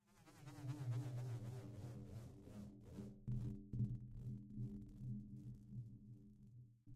metal ball balloon
ball, ballon, metal